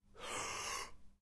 breaths solo5
Clean sample of a person breathing in rapidly, lot of air, 'shock-reaction.Recorded with behringer B1
shocked, noise, shock, air, suspense, wind, tension, breath